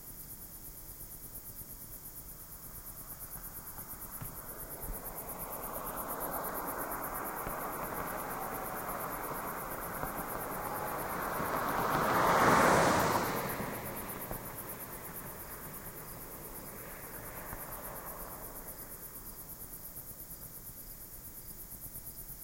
A car driving by, with crickets in the background.